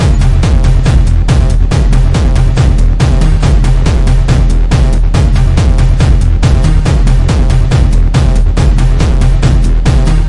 A random drum beat I composed on fruityloops